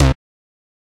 Synth Bass 008
A collection of Samples, sampled from the Nord Lead.
synth, nord, bass, lead